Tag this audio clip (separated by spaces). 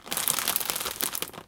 crunch crackling scrunch cookie cracking crunching random crack